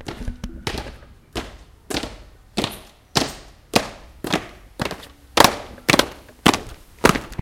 TCR sonicsnaps HCFR Jules L.,Théo,Jules V.,Yanis stairs
Field recordings from Haut-Chemin school (Pacé)) and its surroundings, made by the students of CE2-CM1 grade.
TCR, sonicscnaps, pac, france